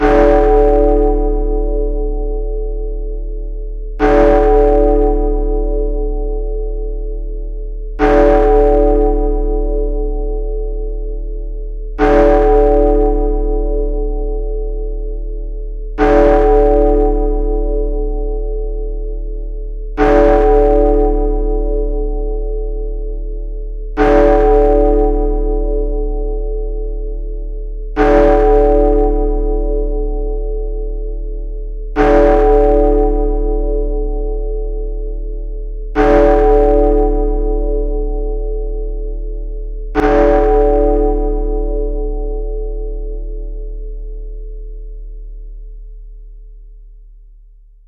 Tollbell - 11 strikes
Large bell with 11 strikes. 4 seconds between strikes; long tail.
large-bell, 11-bell-strikes, big-ben, multiple-bell-strikes, tollbell, eleven-oclock